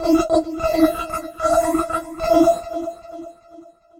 THE REAL VIRUS 11 - VOCOLOOPY - E5
A rhythmic loop with vocal synth artifacts. All done on my Virus TI. Sequencing done within Cubase 5, audio editing within Wavelab 6.
multisample, loop, vocoded, vocal